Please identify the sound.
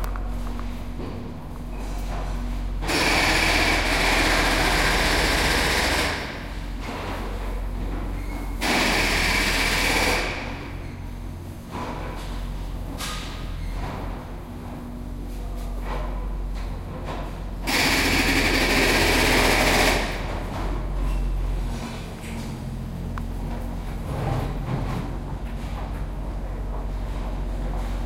subway drilling
percussion drilling in a subway tunnel.
edirol R-1 built-in stereo mic
ambiance, field-recording, machines